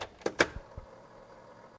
Turn on and whirr
buzz, latch, machine, mechanical, whir